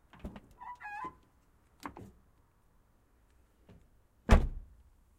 Opening and closing car door little screeching